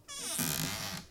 A short creak, opening a cupboard at "normal" speed.